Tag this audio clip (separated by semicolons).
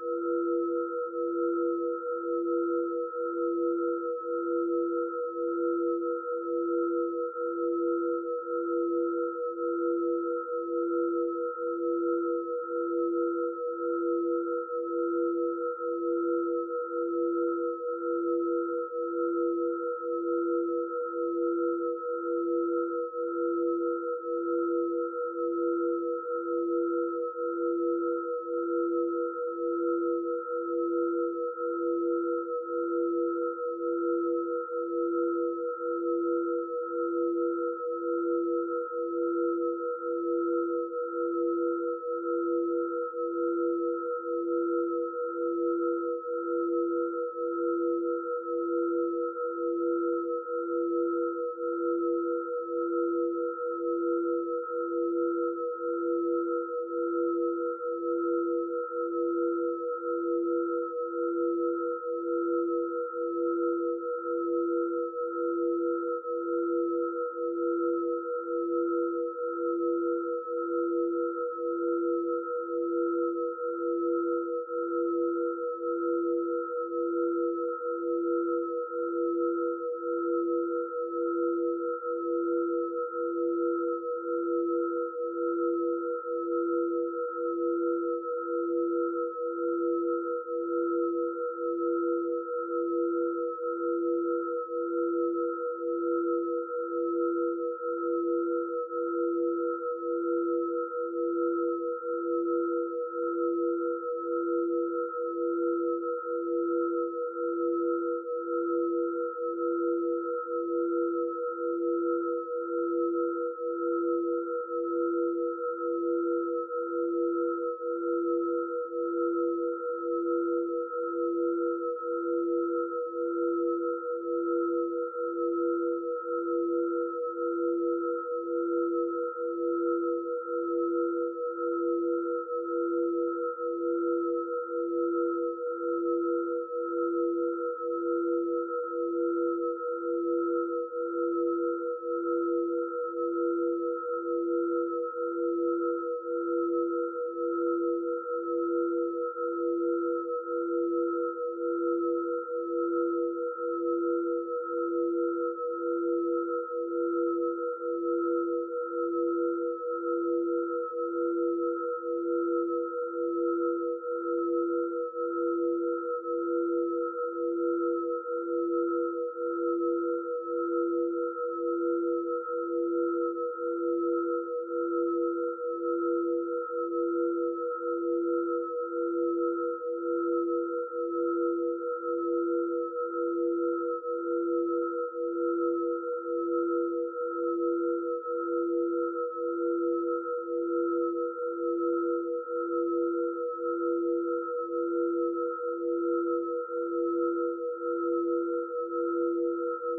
ambient; background; electronic; experimental; loop; pythagorean; sweet